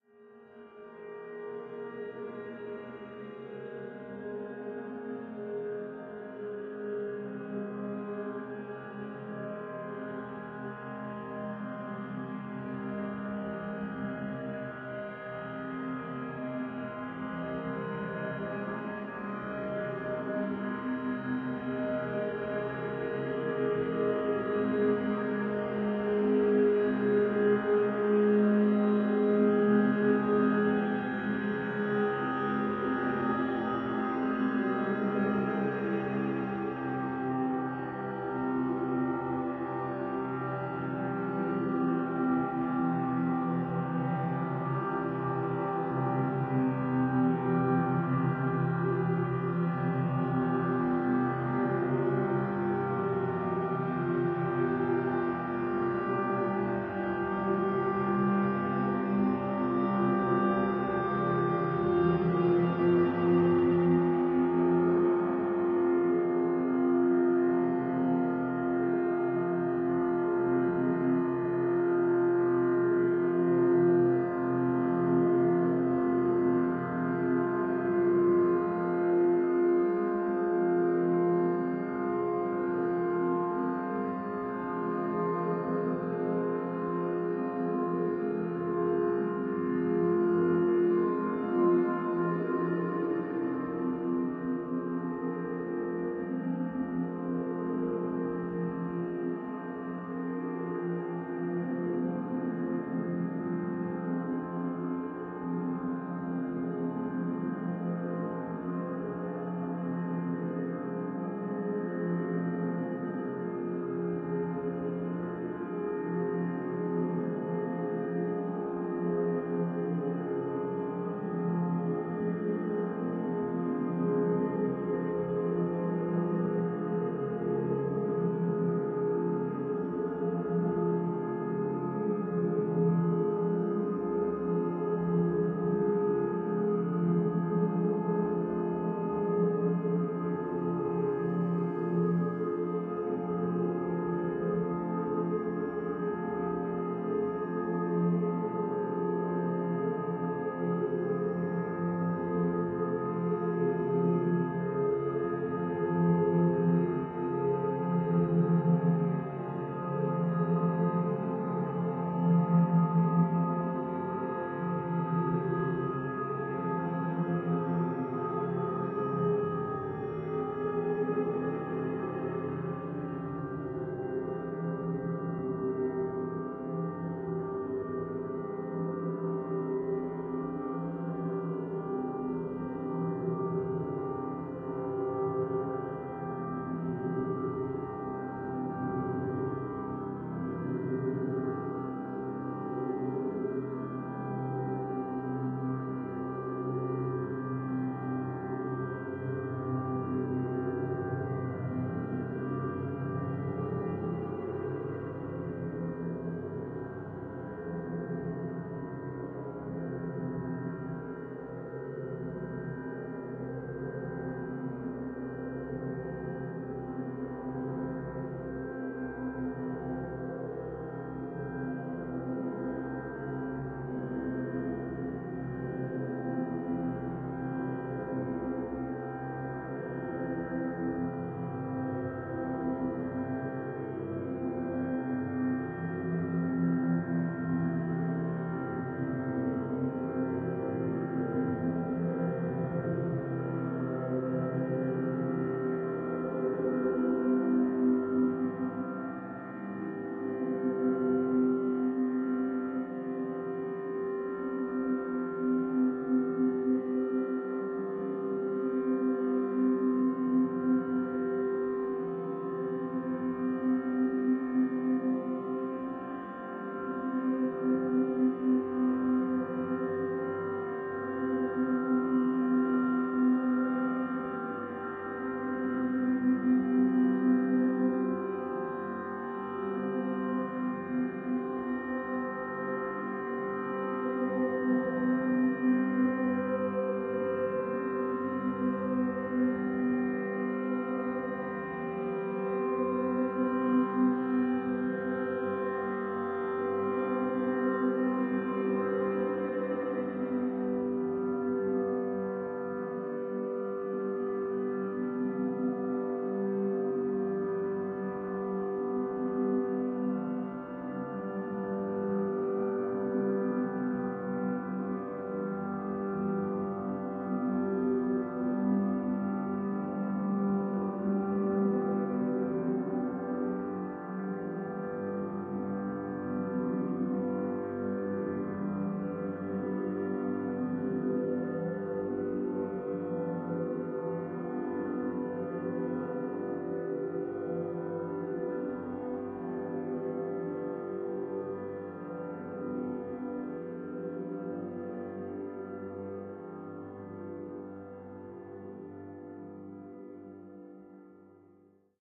Music ambience, slow, piano, playing, reversed, dramatic
Reversed and stretched recording of me playing on a piano.